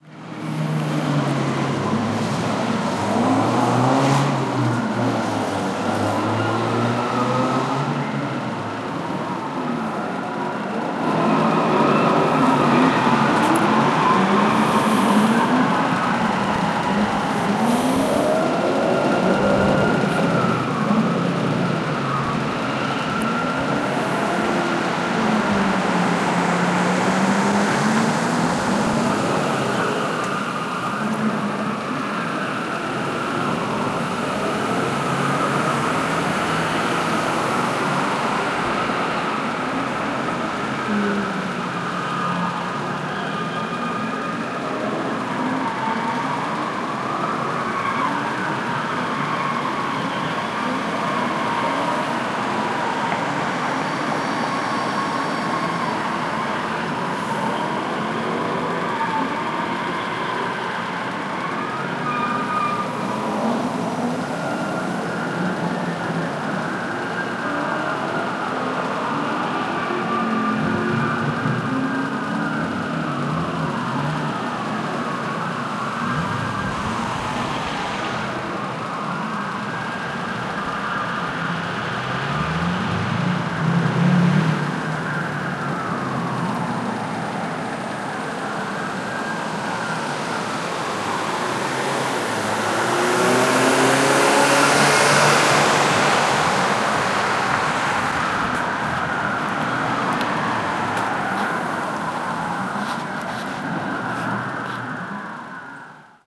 SF Sideshow
San Francisco Sideshow, 16th and Potrero
Sideshow (automobile exhibition) from Wikipedia;
"A sideshow is an informal demonstration of automotive stunts now often held in vacant lots, and public intersections, most often in the East Bay region of the San Francisco Bay Area, United States. Sideshows first appeared in Oakland, California in the 1980s as informal social gatherings of youth. Common activities at sideshows include doughnuts and ghostriding. The latter involves driving a car, opening the door and climbing out, blasting off, sometimes onto the hood, sometimes standing or dancing next to the car while the car continues to roll. Violent incidents, including fights and shootings, sometimes occur at the events."
auto, automobile, burning-rubber, car, car-chase, doughnuts, drag, drive, driving, engine, ghostriding, motor, muscle-car, race, racing, rev, revving, ride, San-Francisco, screech, sideshow, skid, speed, spin, spinning, stunt, tire, tires, trick, vehicle